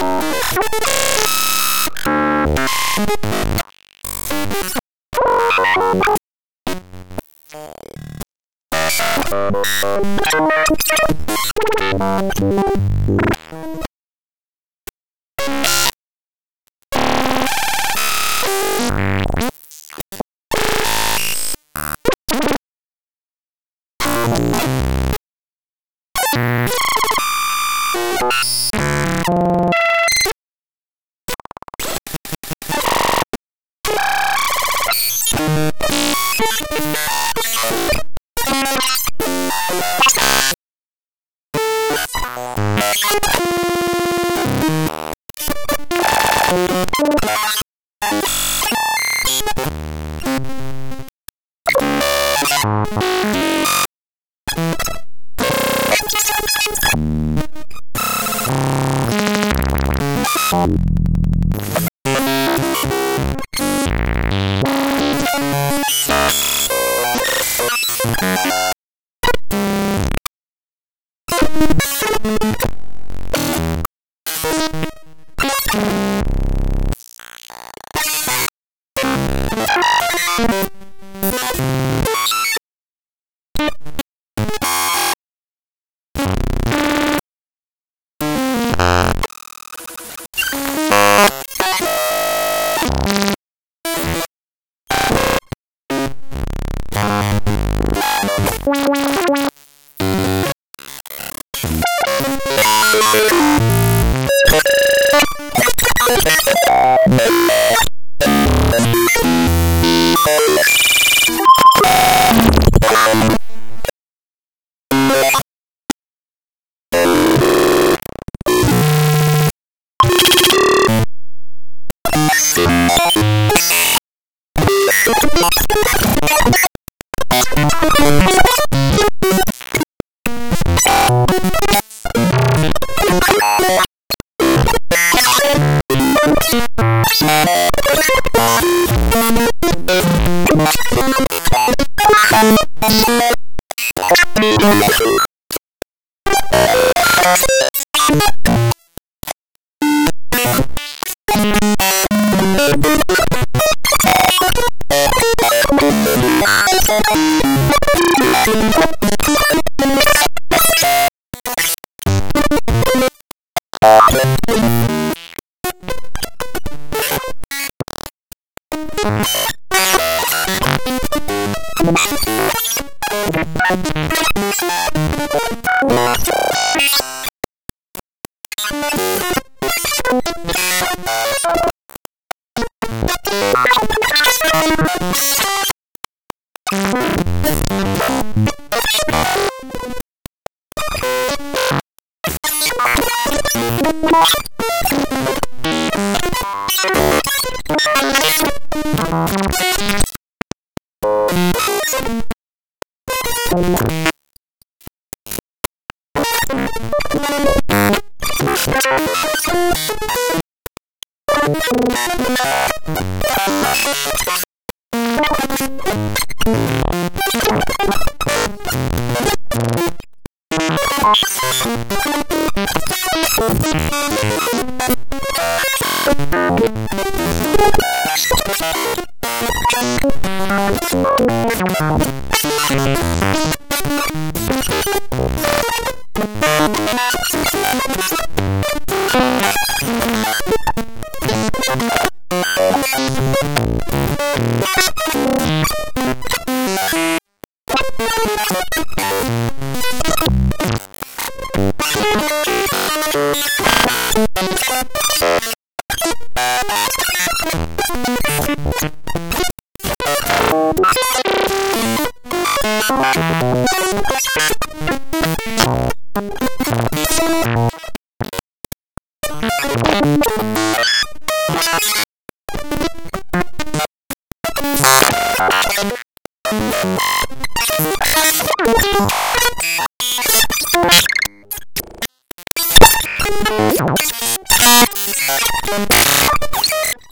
fractal 303 freakout
ReaJS 303 clone modulated by fractal with xy grid overlay, then sliced/cut up into random prime number subdivisions
glitch, 303, noise, chaos